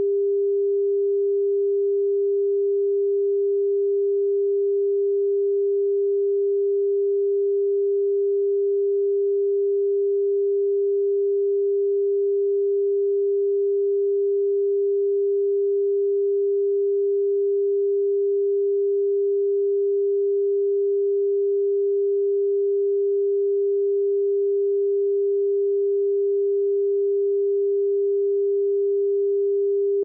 400hz @ -18 dBFS 30 second
a 400Hz -18dBFS 30 second line up tone line up tone.
UK